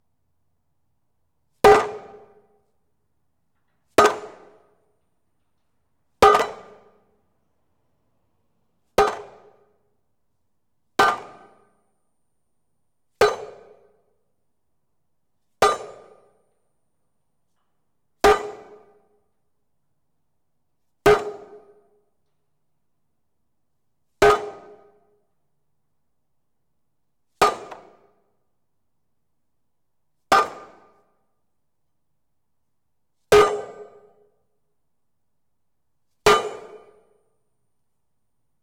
Aluminum Plate Ground 01
Aluminum plate supported on ground being hit by a rubber dead-blow hammer. Recorded in a 28,000ft³ shop so there is some natural reverb.
Rode M3 > Marantz PMD661.
aluminum, bang, clank, hit, impact, industrial, metal, metal-clank, metallic, metal-plate, percussion, ping, plate, resonance, ringing, strike, thud